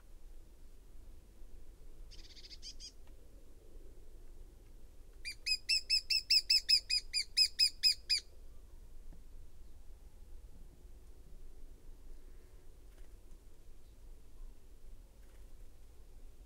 Spotted Woodpecker in a bush.
Russia, Taldom, September 2014
DPA 4060, Telinga Parabolic Reflector, Sony PCM D100 + Sound Devices Mix-PreD
Autumn, Bird, Forest, Russia, Wildlife, Woodpecker